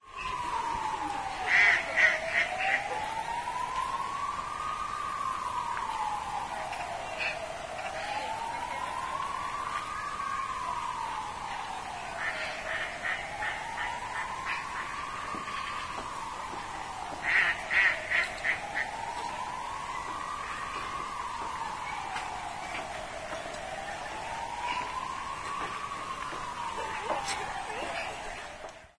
20.09.09: before 18.00 in the New Zoo in Poznań/Poland. The place with animals from the South America.Some birds are audible. In the background the sound of the ambulance.